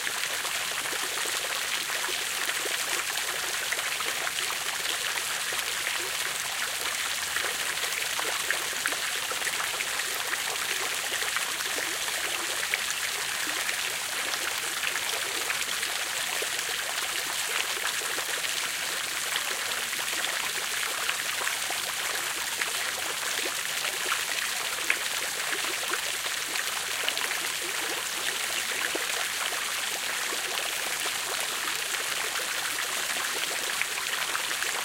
One of the many small fountains in the "Parc du Mont des Arts" in Brussels on a late July evening. Equipment note: Nagra Ares-PII+ with Nagra NP-MICES XY stereo microphone.